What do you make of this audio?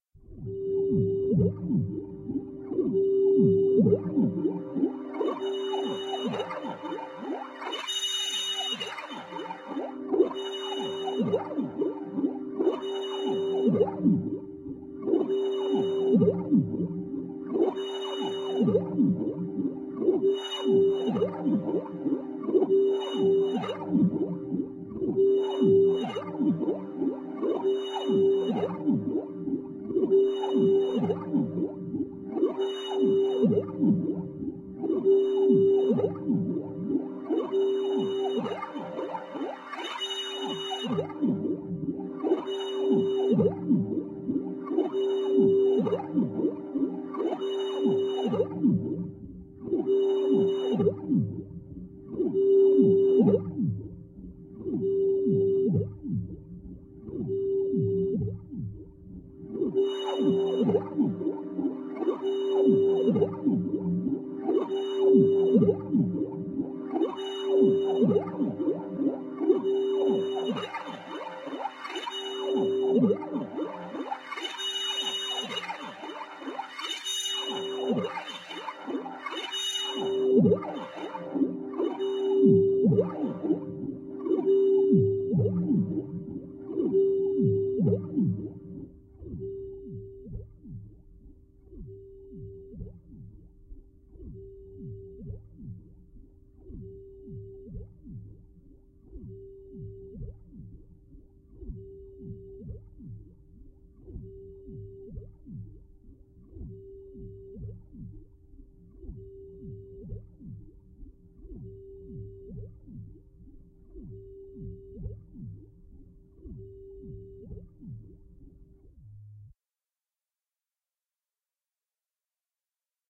Synthetic Moan
Synthetic creature soundscape which sound as howling or moaning, a bit melancholic.
Created with Clavia Nord Modular
[note to self] Old Morpheus again (Clavia - VoiceHrip03)
creatures, melancholic, alien, synthetic, creature, electronic, howling, moaning